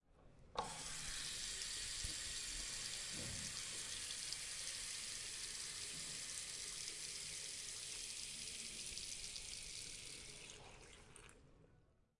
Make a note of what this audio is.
Sound of water from a public bathroom.
Sounds as white noise filtered with a high pass filter.
Recorded with a Zoom H2 (cardioid polar pattern, medium sensitivity and at 20 cm) in a public bathroom of the building 54 of the Universitat Pompeu Fabra in Barcelona